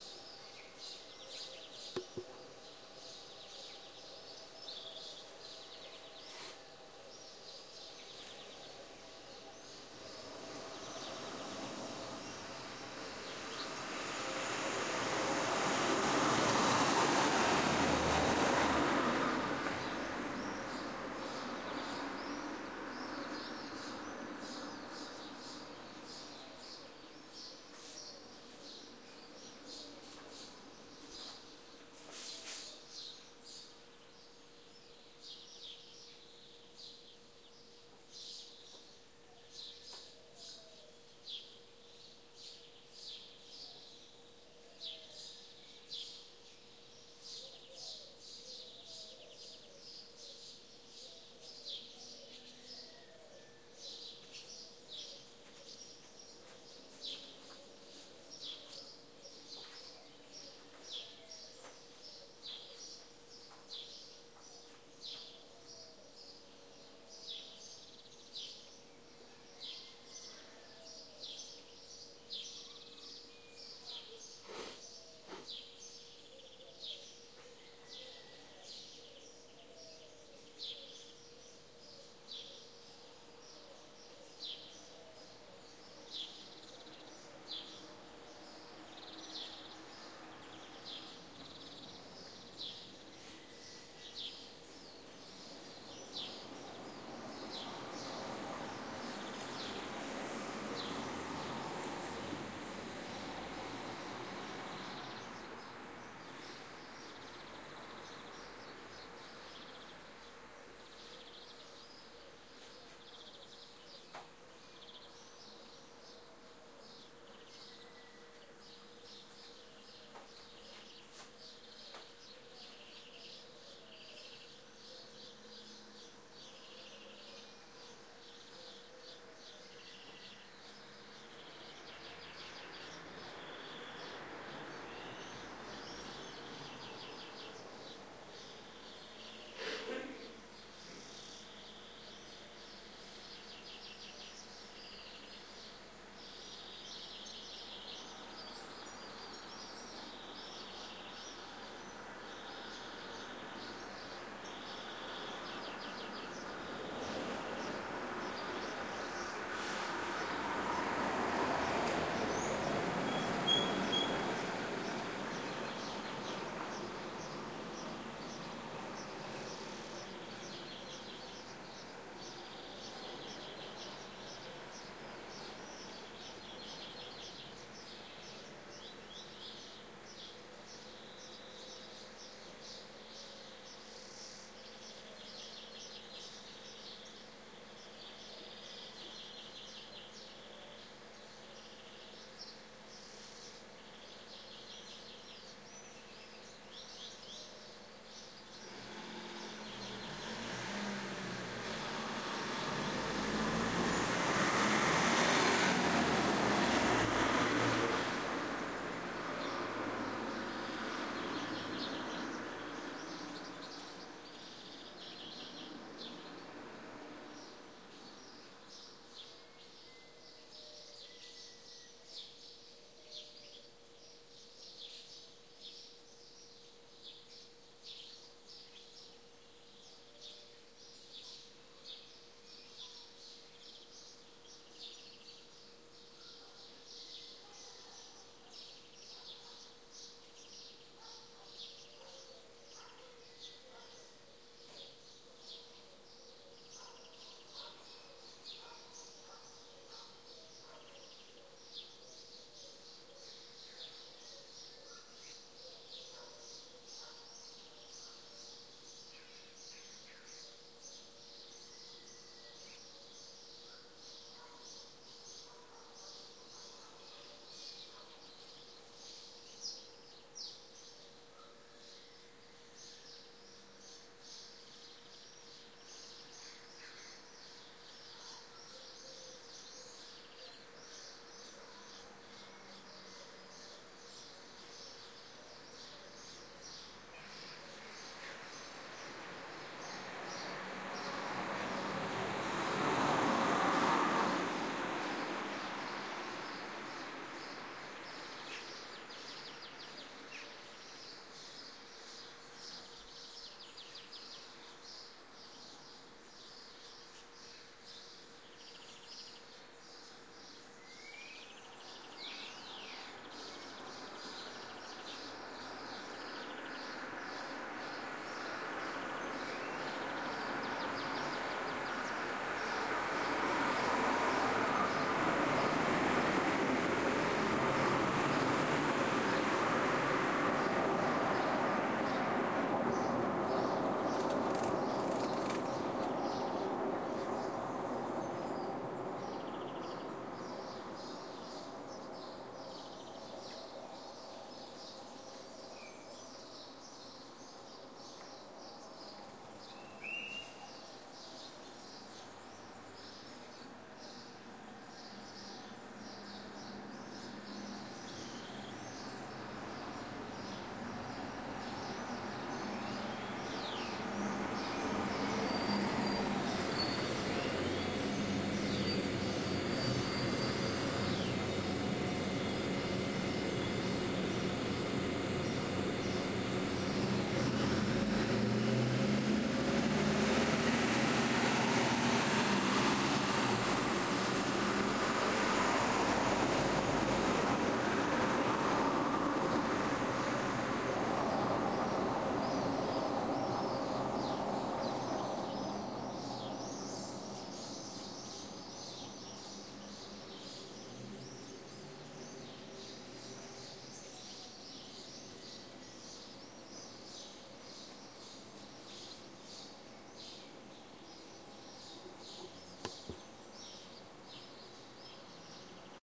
130611-mapa sonoro évora exp 03
in a busy street of Évora #3